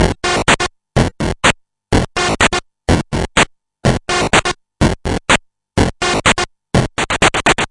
Atari 2600 Beat 4
Beats recorded from the Atari 2600
Atari, Beats, Chiptune, Drum, Electronic